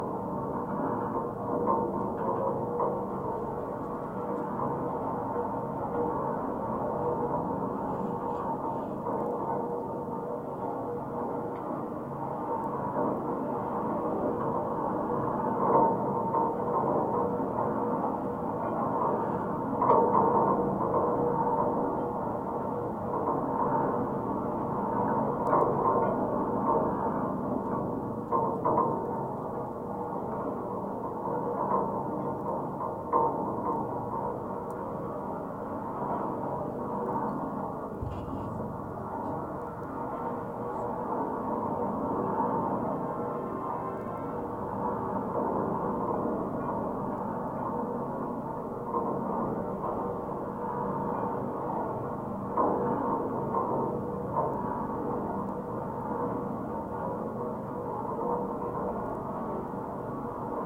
BB 0102 suspender 01
Contact mic recording of the Brooklyn Bridge in New York City, NY, USA. This is one of the first suspender cables accessible from the pedestrian walkway on the Brooklyn side. Most of the stimulus is automotive traffic. Recorded April 11, 2011 using a Sony PCM-D50 recorder with Schertler DYN-E-SET wired mic attached to the cable with putty.
contact, contact-microphone, field-recording, metal, microphone, Sony, wikiGong